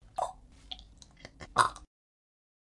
This is a sound effect which I created by performing the sound of gagging as one is slowly slipping away from being forced poison down throat.